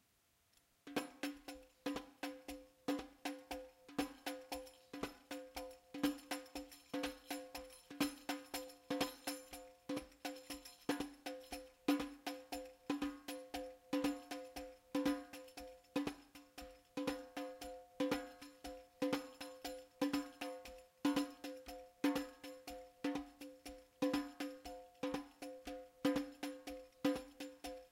riq rhythm-1
A simple rhythm played on riq. Recorded with Zoom H2n and Sennheiser mic. No editin, no effects added.